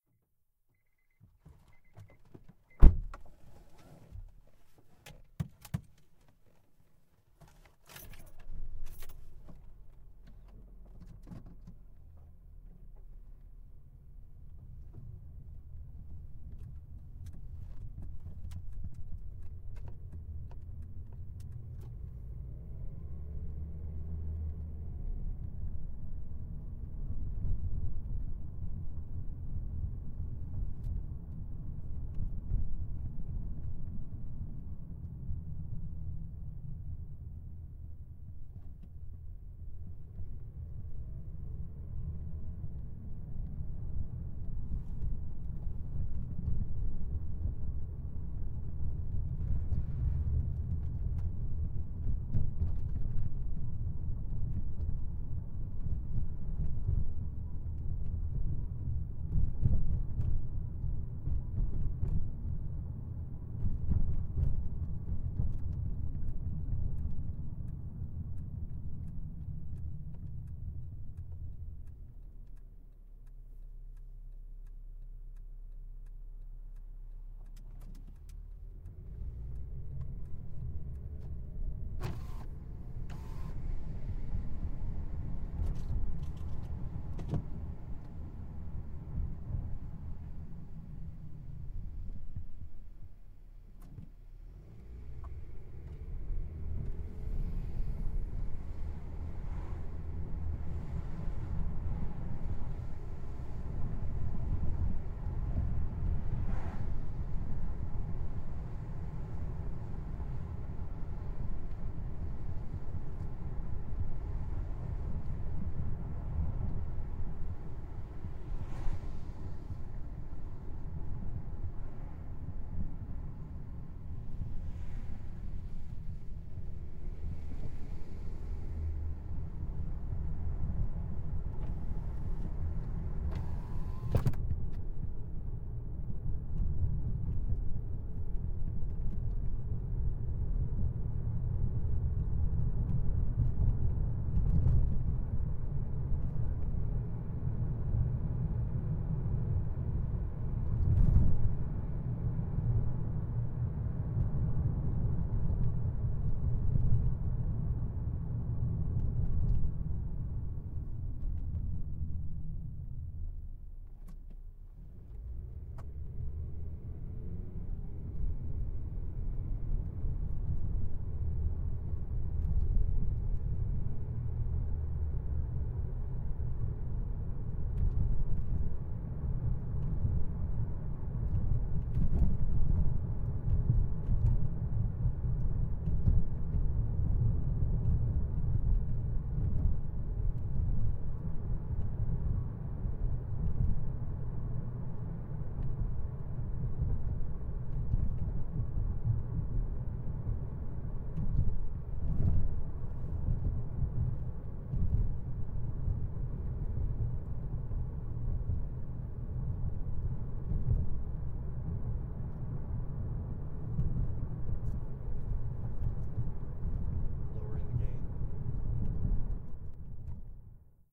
CAR GETIN and drive Y
ambisonic WXYZ. mono Y track of ambisonic. Car interior driving. Windows open and shut different surfaces. gravel. smooth road and bumpy. low to medium speeds. backup at end.
ambisonic, car, driving, interior, keys